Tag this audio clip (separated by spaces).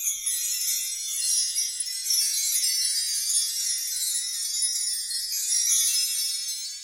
chimes glissando orchestral percussion wind-chimes windchimes